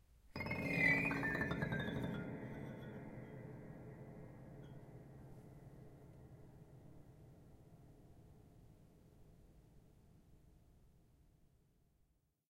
Creepy sound produced with an old upright piano's upper strings. A short glissando played downwards on the piano strings with fingers. Damper pedal held down. Recorded with ZOOM H1.